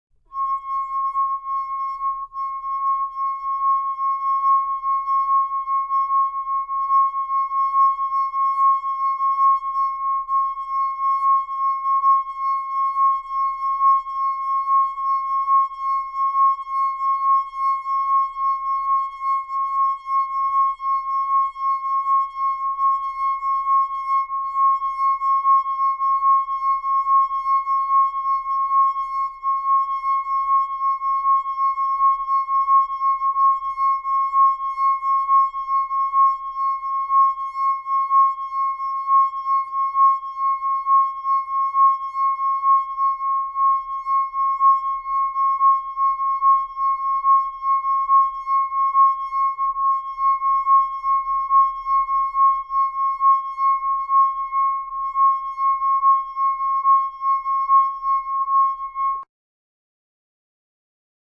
18 Tehoste lasinsoitto7
Playing a water glass, a very even, sinewave-like sound
wineglass, ringing